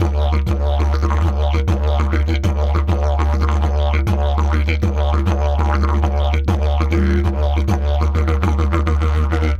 fast 8bar key-d1
A fast didgeridoo rhythm at 100 bpm. This was played on a didgeribone, key is D.
Recorded with Studio Projects B-1 -> Sound Devices 722 -> editing in Audition and slight timeshift (from 97.5 bpm to 100 bpm) in Nuendo.
100bpm, dance, didgeridoo, didjeridu, fast